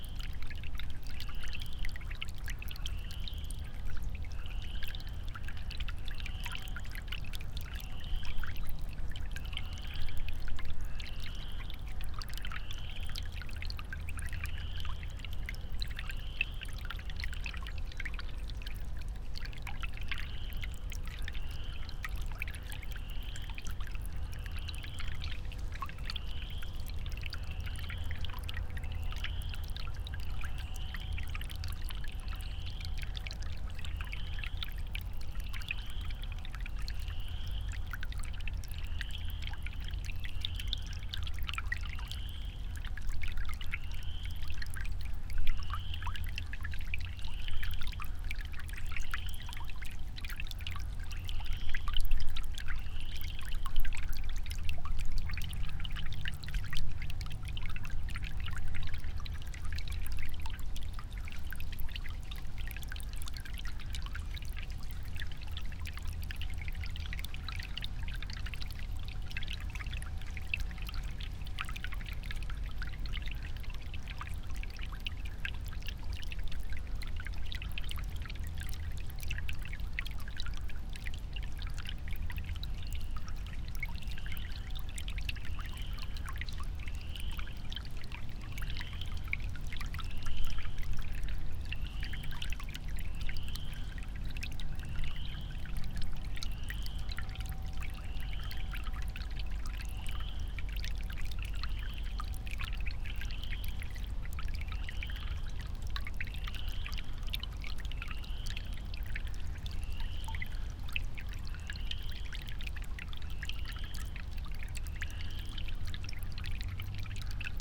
EarlySpringCreekPeepersMarch6th2016
A peaceful trickle of water flowing over a small rock lip in an early Spring creek in the forest. Spring Peepers are heard in the background. Recording made on Sunday March 4th, 2016 at 1:30PM with the temperature at 50 degrees. Equipment: PMD661 recorder and the microphone is the Audio Technica 4022.
Creek, field-recording, Forest, Natural, Nature, Spring, Trickling, Water